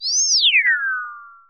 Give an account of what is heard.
Round the legs screecher
dull flat tones Annoy me c so I tried to demonstrate more interesting tones than some old flat tones